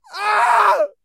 Short scared scream 2
Acting scared, yelling frightened.
Recorded with Zoom H4n.
acting, afraid, alarmed, anxious, fearful, frightened, male, scared, scream, startled, voice, yell